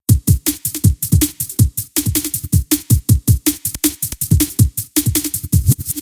Drum 'n Bass Loop 160BPM
A nice simple DnB loop I made in FL Studio 12
You Don't have to, but its the most you could do :)
160-BPM, beat, breakbeat, drum, drum-and-bass, drum-loop, drums, loop